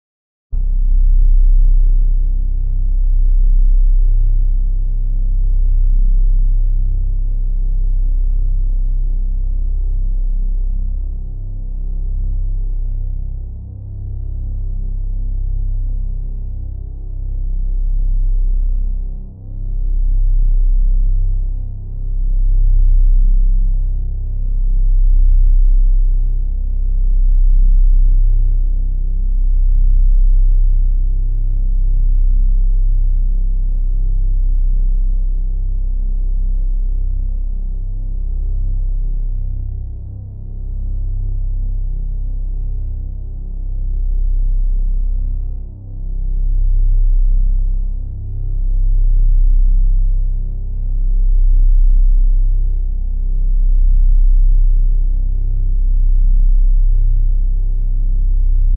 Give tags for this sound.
bass low rumble synthetic

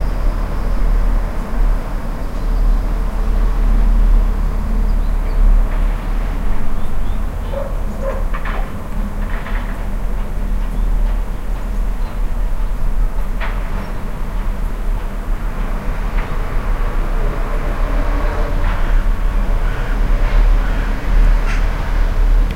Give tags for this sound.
Street Road City Traffic Transport Cars Travel Public

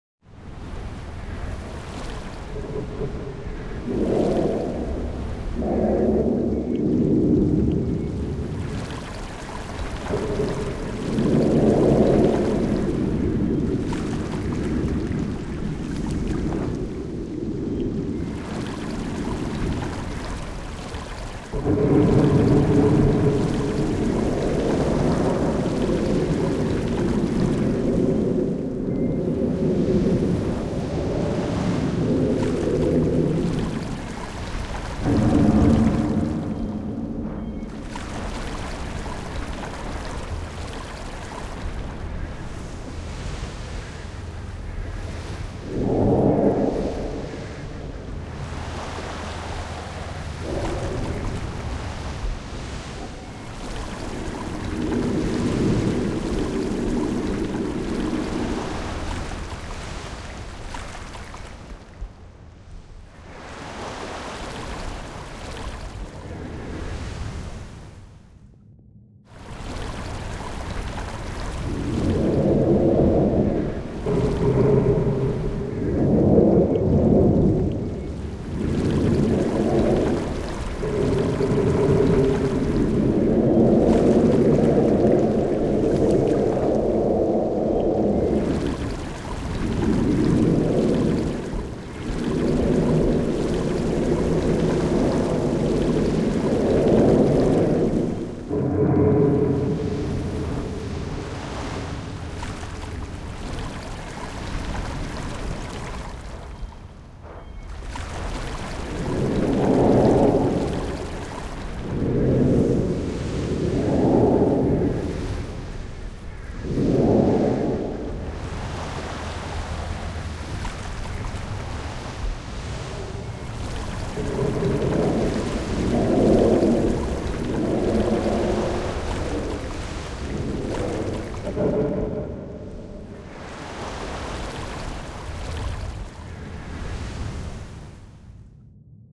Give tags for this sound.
Horror Creepy Strange Amb Witcher Fantasy Forest Dark Movie Park Ambiance Film Eerie Bird Environment Atmosphere Sound-Design Wind Sound Spooky Ambience Drone Ambient Sci-Fi Witch Atmo Birds Scary Cinematic

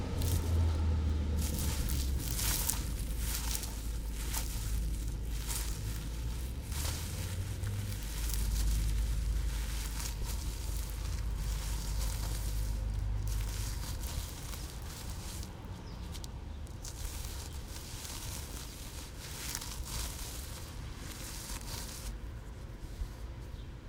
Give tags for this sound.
outdoor ambient dirt shuffling crunch

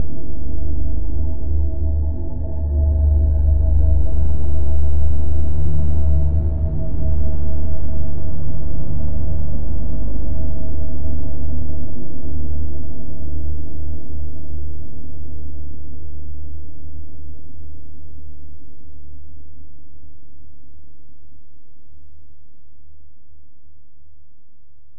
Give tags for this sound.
ambient deep space drone soundscape

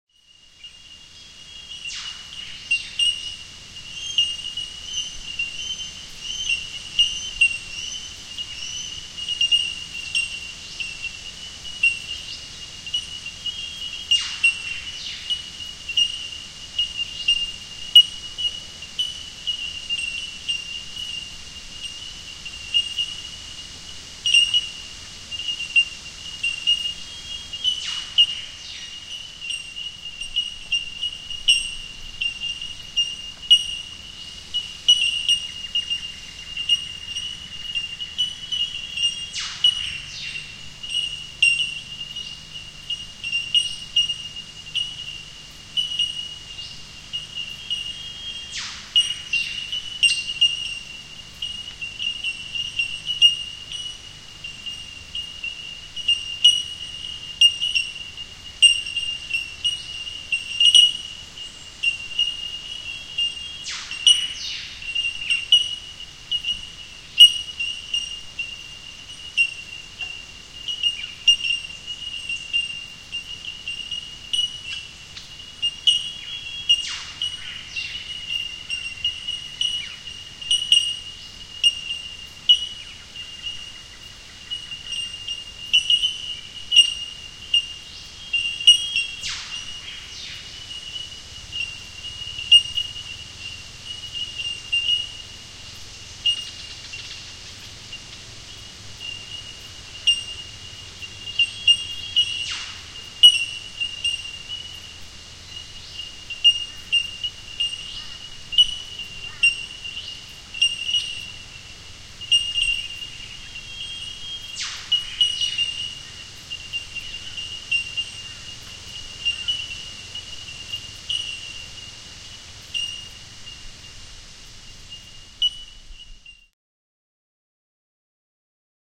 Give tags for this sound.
field recording bellbirds sample birds nature australia animals

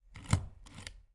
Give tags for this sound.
post press self-inking aproved certified completed stamping bank office letter click relase stationary paper stamp down top-secret cancelled

